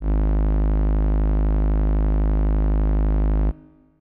FM Strings Gs1
An analog-esque strings ensemble sound. This is the note G sharp of octave 1. (Created with AudioSauna, as always.)
strings, synth